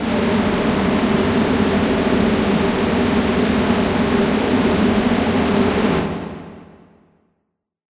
SteamPipe 2 Ambiance C4
This sample is part of the "SteamPipe Multisample 2 Ambiance" sample
pack. It is a multisample to import into your favourite samples. The
sound creates a stormy ambiance. So it is very usable for background
atmosphere. In the sample pack there are 16 samples evenly spread
across 5 octaves (C1 till C6). The note in the sample name (C, E or G#)
does not indicate the pitch of the sound but the key on my keyboard.
The sound was created with the SteamPipe V3 ensemble from the user
library of Reaktor. After that normalising and fades were applied within Cubase SX & Wavelab.
ambient, atmosphere, industrial, multisample, reaktor, storm